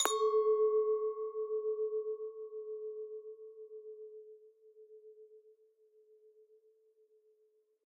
Just listen to the beautiful pure sounds of those glasses :3